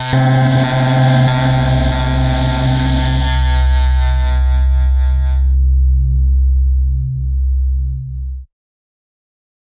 Alien Alarm: 110 BPM C2 note, strange sounding alarm. Absynth 5 sampled into Ableton, compression using PSP Compressor2 and PSP Warmer. Random presets, and very little other effects used, mostly so this sample can be re-sampled. Crazy sounds.
110, acid, atmospheric, bounce, bpm, club, dance, dark, effect, electro, electronic, glitch, glitch-hop, hardcore, house, noise, pad, porn-core, processed, rave, resonance, sci-fi, sound, synth, synthesizer, techno, trance